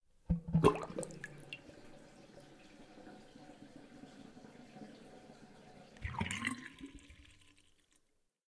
Water drain
Draining water from bathroom sink.